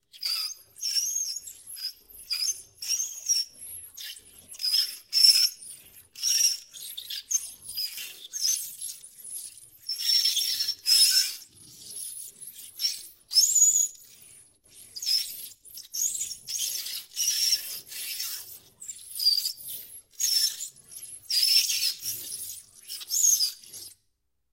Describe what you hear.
Windows being broken with vaitous objects. Also includes scratching.